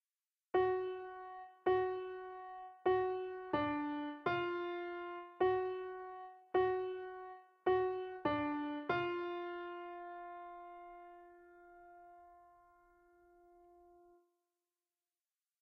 scary-melody
Scary, Melody, Piano